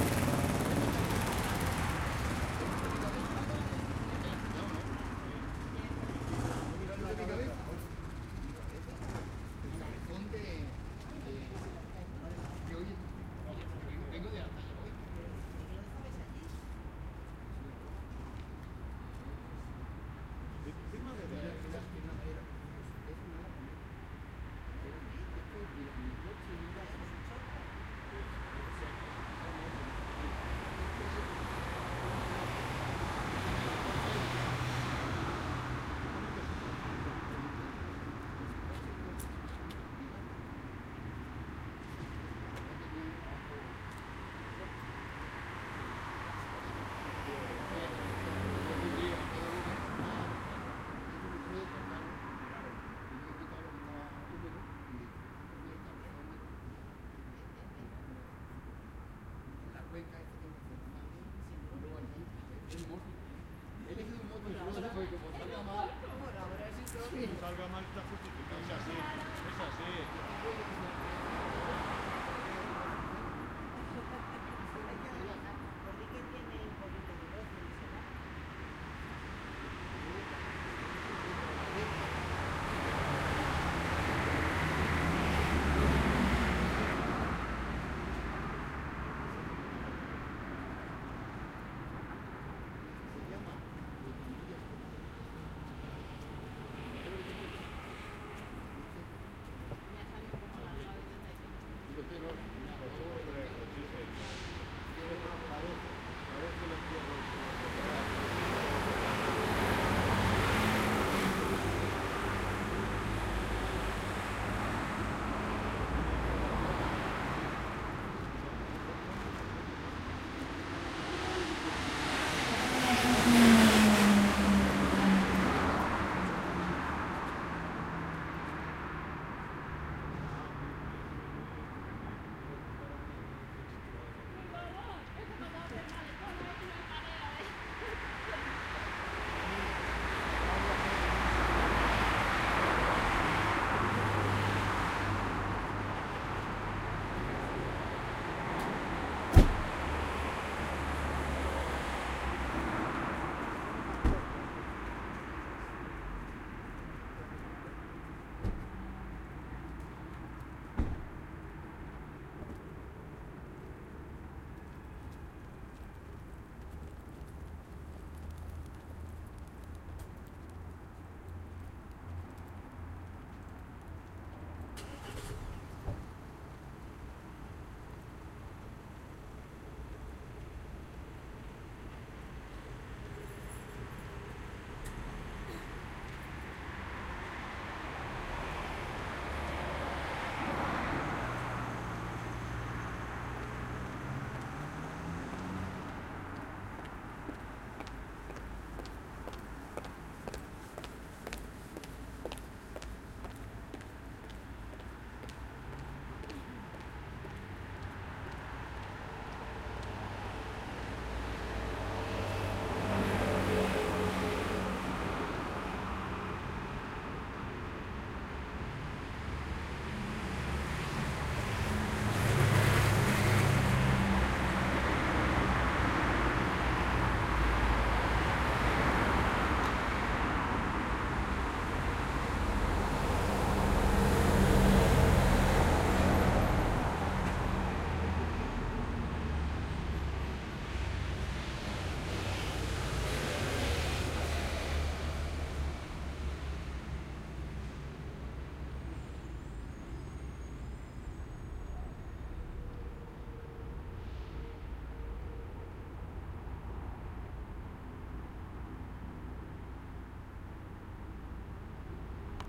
In a bench on the street next to a road at night in Madrid
Any thursday, 12:00 am more or less. I was sitting down in a bench, looking to the buildings opposite me, with the road at my back. My handy recorder Zoom H4n in my hands. Some people was chatting next to a car and then they got inside and they left (you can hear that on the left channel. Got it? "Left" channel :D). You can hear people passing from one channel to another, cars and motorbikes.
It was in C/ Bravo Murillo (Madrid, Spain). In that part, it is a one way street for vehicles, so all of them go from right to left channel.
Custom scratching: Fiverr
ambient, cars, motorbikes, one-way-street, people-chatting, people-walking, street